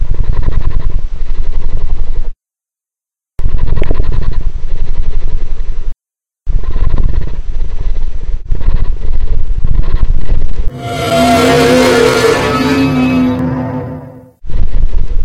A beast growls and then roars. Originally Created to add some flare to a rather pathetic sounding clip from Final Fantasy X.
frightening, roar, dragons, scary, snarl, Godzilla, monsters, Auron